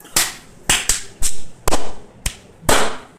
Recorded hitting myself with my mobile. Can use in scenes in which fighting occur, especially slapping.